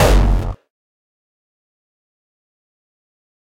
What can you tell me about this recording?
Hardstyle kik 003
Kick made in caustic layered about 4 kicks .
¶0∆π√
core, distorted, frenchcore, Gabba, gabber, hard, hardcore, hardstyle, kick, Schranz, speedcore